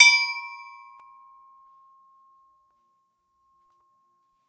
Small Brass Bell
An old brass mortar that belonged to my grandmother in Romania, hit with a metallic hammer.
bell, small, brass